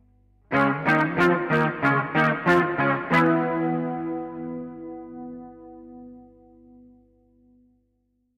Dissonance on Major sound
Example of a dissonance in a major riff
guitar, dissonance, Electric, major